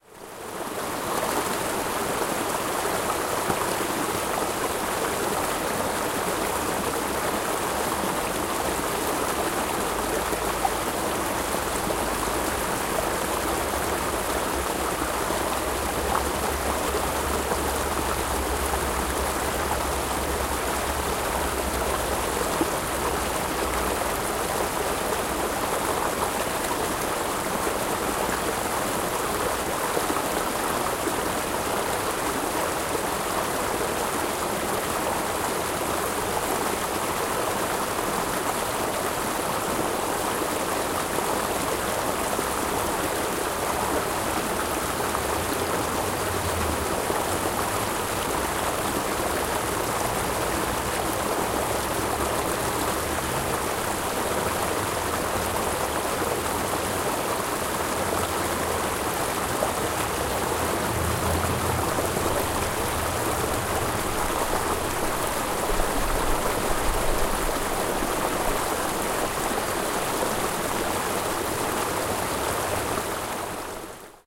Moutain Stream
A mountain stream recorded on an H4 zoom.
babbling, flow, flowing, river, stream, water